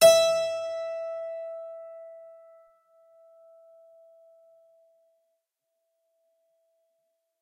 Harpsichord recorded with overhead mics
instrument, stereo, Harpsichord